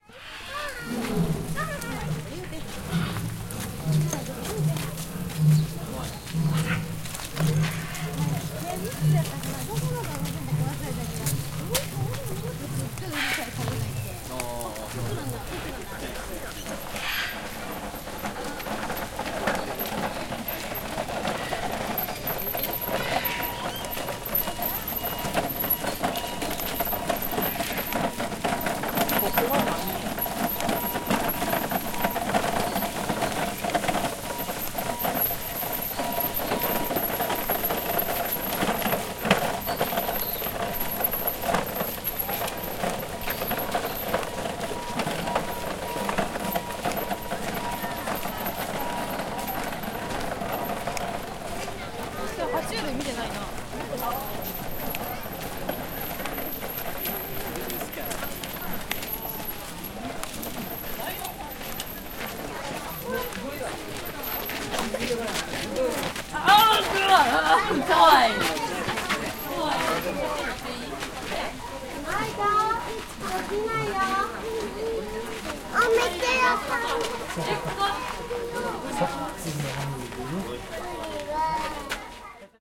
Kyoto-Zoo
Fragments of a day in Kyoto Zoo, caged animals, free-running children.
kids, japan, people, kyoto, zoo, trolley, animals